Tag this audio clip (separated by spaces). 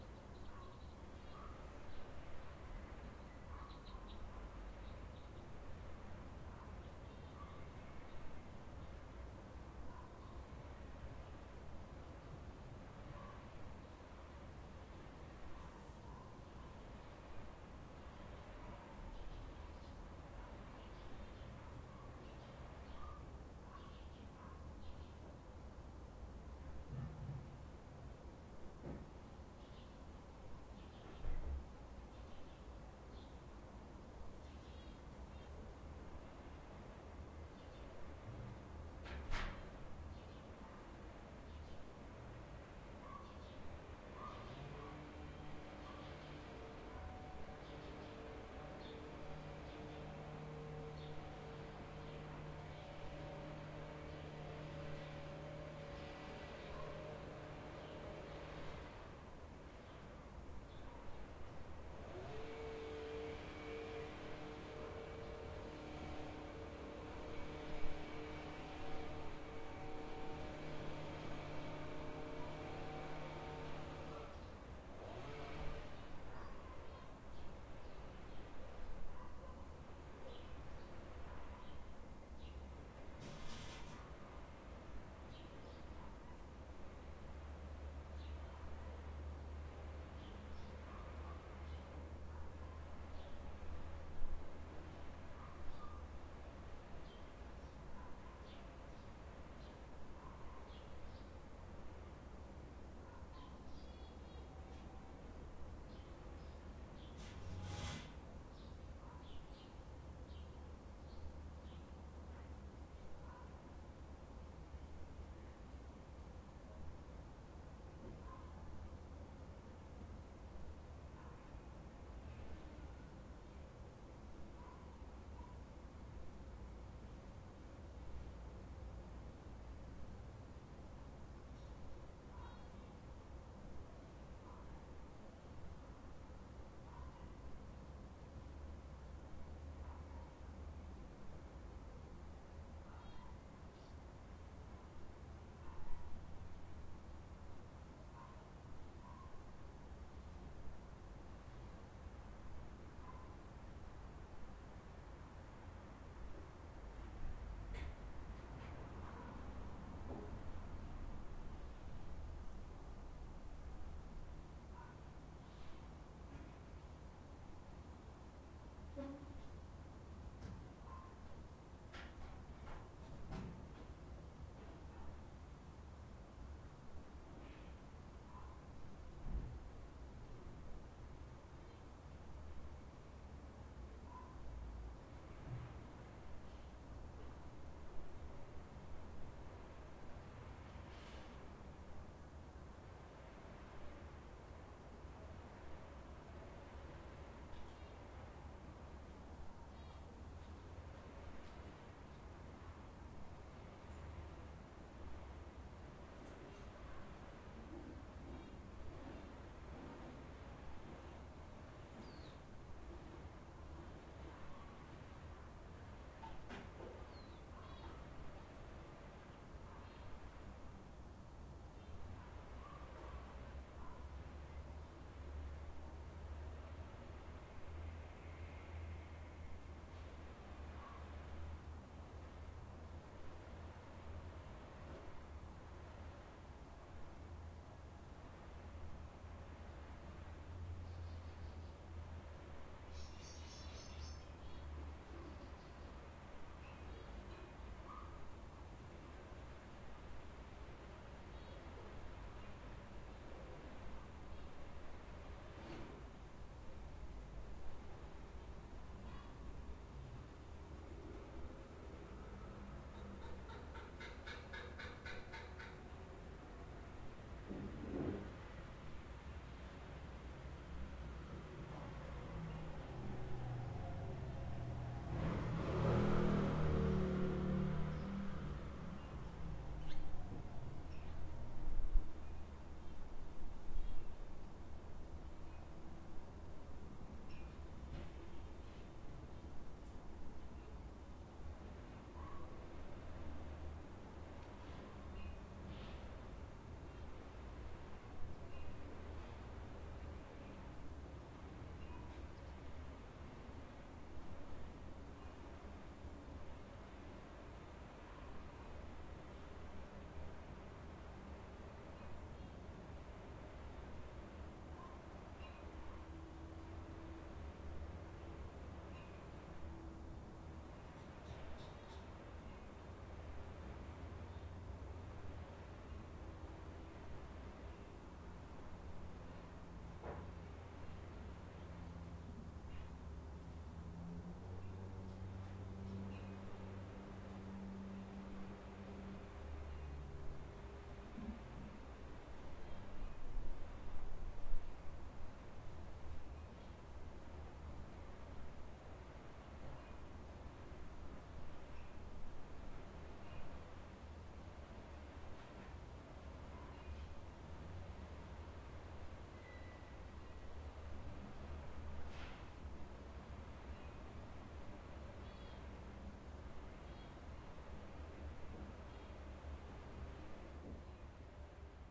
Travel Nature Ambient